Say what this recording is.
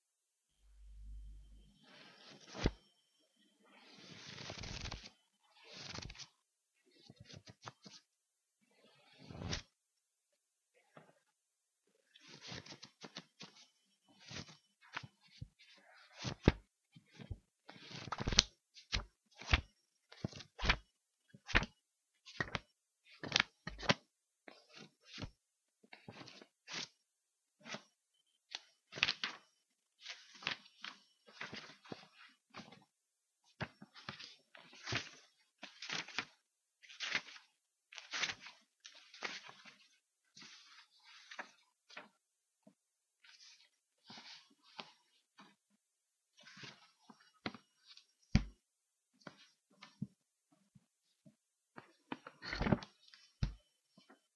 Just messing around with a book, opening and closing, rustling the pages, turning individual pages.
opening, book, closing, turning, pages, rustling